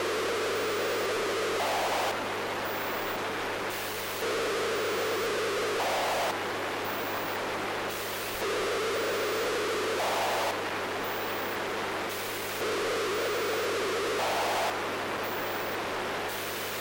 Rhythmic 001 noise
A slow rhythmic pattern made up of different noise sounds. From the Mute Synth 2, straight into the laptop mic input.
Mute-Synth-2, Mute-Synth-II, noise, rhythm, rhythmic, seamless-loop, slow